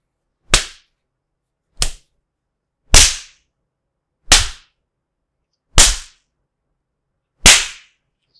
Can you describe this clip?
A whipping / spanking like sound.
Recorded with a Blue Yeti microphone.